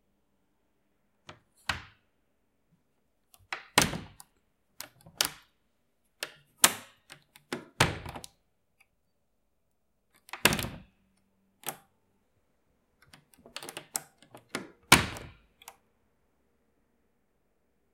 Open & Close Lock
close, Open, closing, opening, lock, door